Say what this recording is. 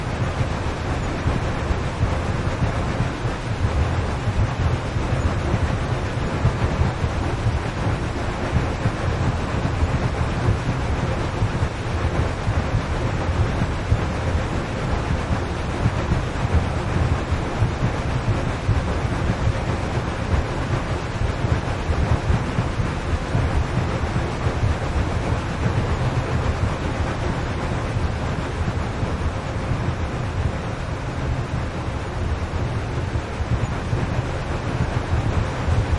Looping sound effect resembling a steam train locomotive. Created using granular synthesis in Cubase 7.